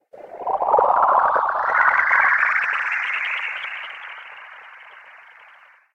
another synthetic file thru orangator, underwatery with an ascending sequence.